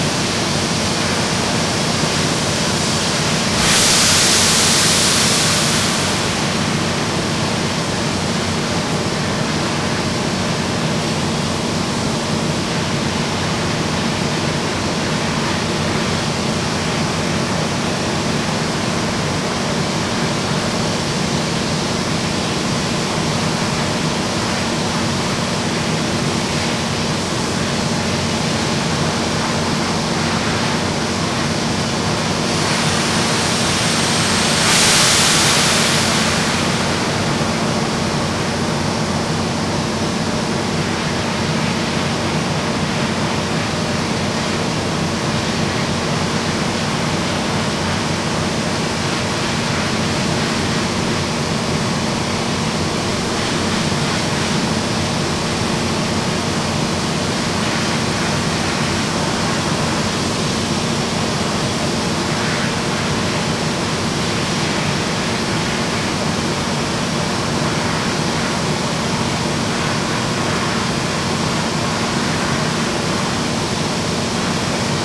noisy industrial factory ambience2 with releases
ambience, factory, industrial, noisy, releases